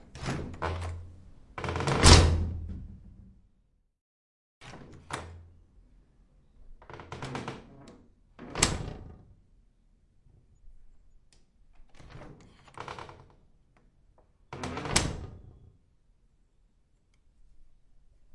door wood old open close creak rattle lock click
door, close, rattle, click, old, lock, creak, open, wood